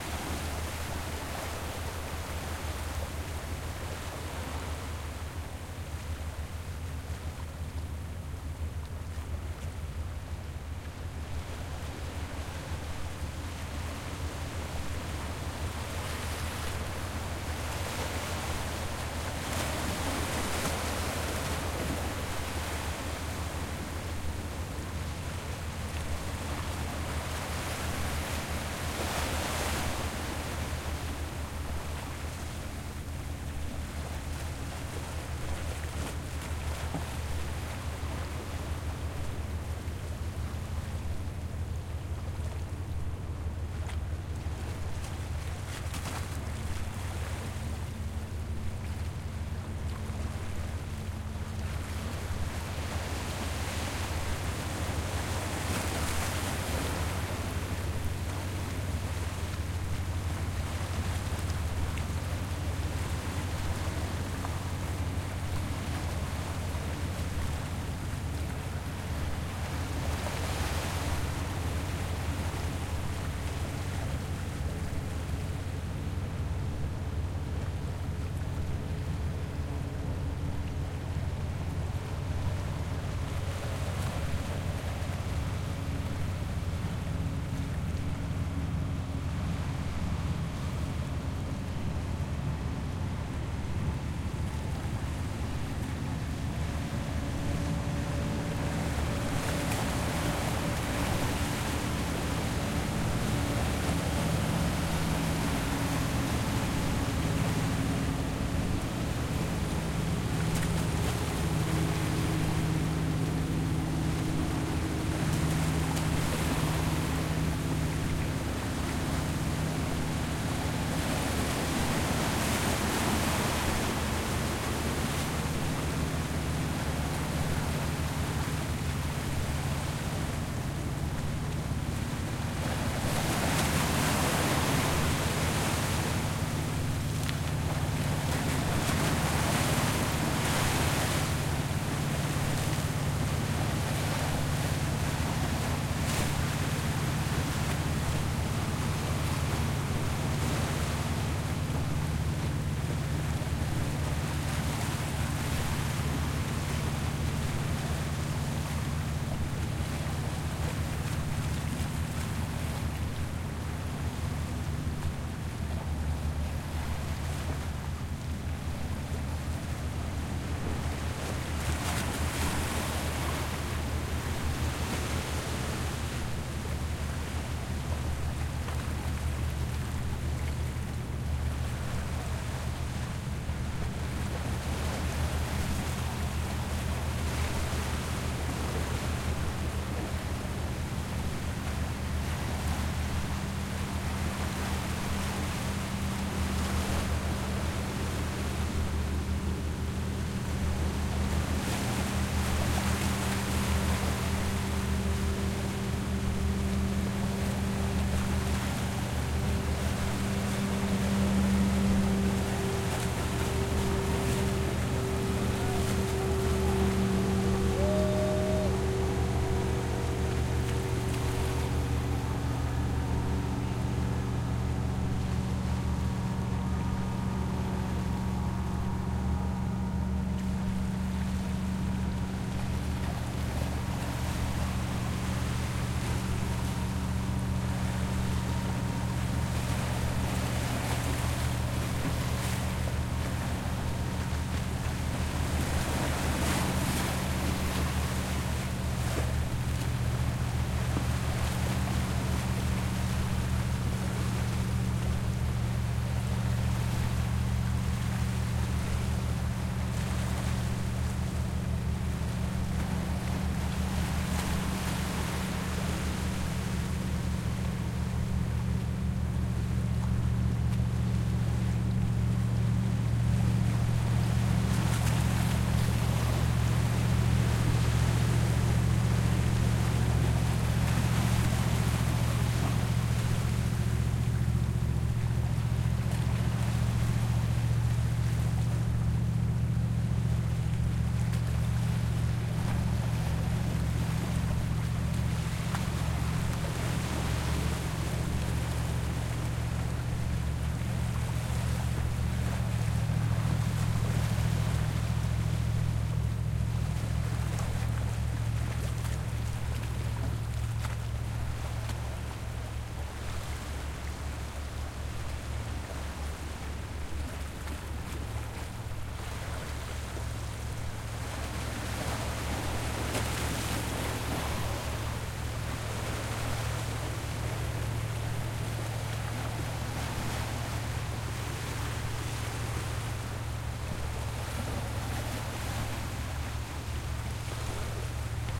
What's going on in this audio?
04 Lanes Island Water 3 2BoatsPass 48 24
Ocean water crashing on the rocks of the Maine coast. 2 fishing boats can be heard passing, on one you can hear a lobsterman shout "Wooo!" as they go by.
maine, ocean, rocks